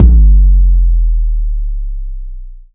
Asylum Kick 01
A heavily processed TR-808 kick drum. A little thump and has a slight reverb.
kick, one-shot